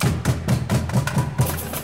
thats some recordings lady txell did of his percussion band "La Band Sambant". i edited it and cut some loops (not perfect i know) and samples. id like to say sorry for being that bad at naming files and also for recognizing the instruments.
anyway, amazing sounds for making music and very clear recording!!! enjoy...